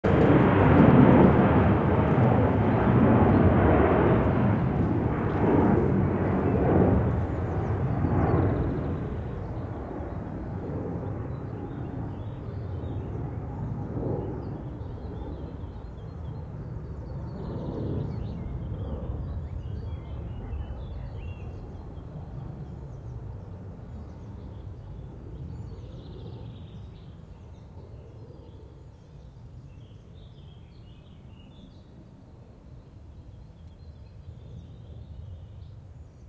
Sound taken during the international youth project "Let's go urban". All the sounds were recorded using a Zoom Q3 in the abandoned hangars U.S. base army in Hanh, Germany.
dark, deep, drone, experimental, hangar, reverb, sampled, sound-design, soundscape, zoomq3